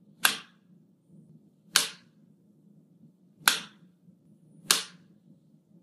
Resonant light switch on and off
A light switch flicks on and off in an empty room. (Like, a totally empty room. No furniture, or anything.)
click, light, light-switch, off, switch, switches, toggle